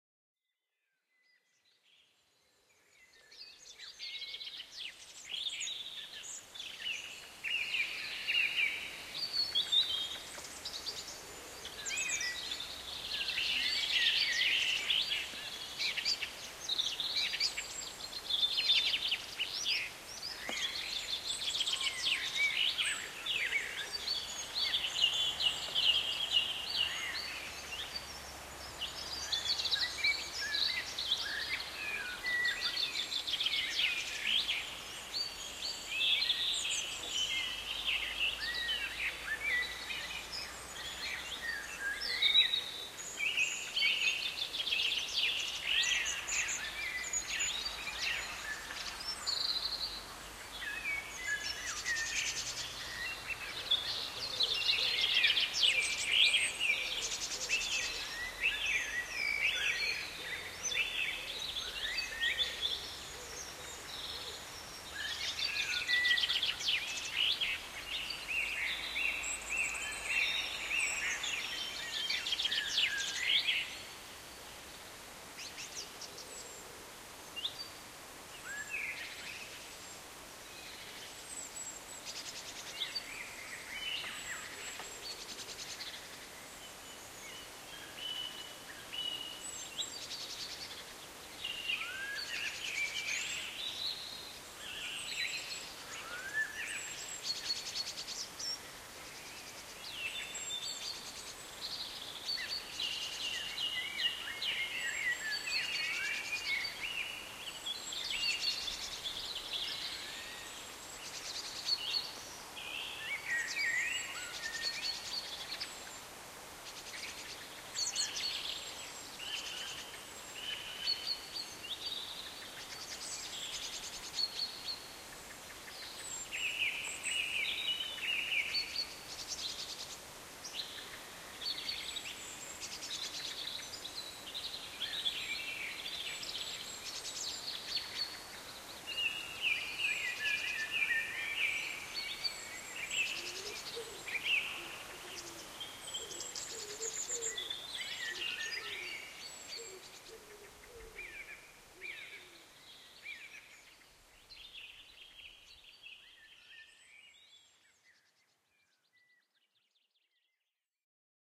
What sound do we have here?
muchty birds1
The Sound of birdsong recorded in woodland near Auchtermuchty, Fife, Scotland.
Recorded on a Sharp MD-SR40H mini disc with a Audio Technica ART25 stereo microphone
birds, birdsong, countryside, rural